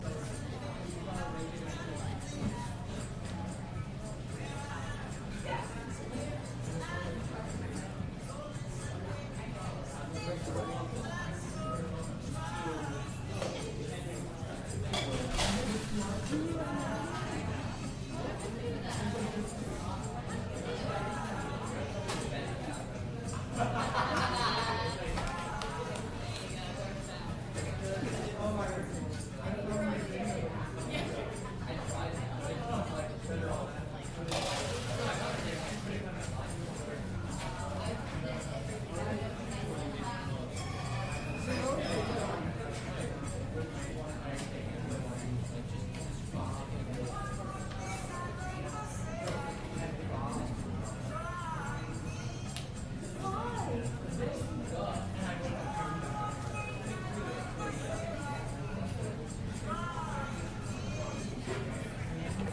Blue Ribbon Restaurant Night (RT)
Inside a chicken restaurant in New York City at night
ambience, background, background-sound, tone